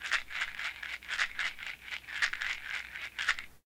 Tape Pill Bottle 7
Lo-fi tape samples at your disposal.
lofi, collab-2, bottle, Jordan-Mills